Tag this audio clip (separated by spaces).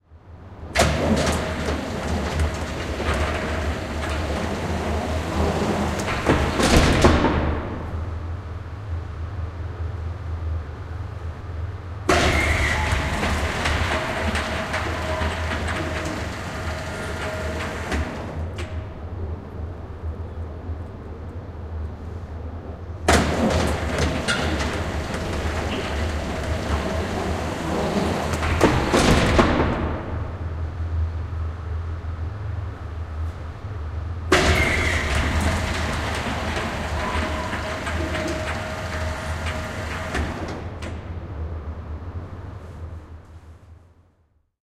Field-Recording Ovi Tehosteet Finnish-Broadcasting-Company Soundfx Halli Garage Parkkihalli Yle Door Finland Parking-garage Automaattiovi Suomi Liukua Yleisradio Parking Automatic-door Sliding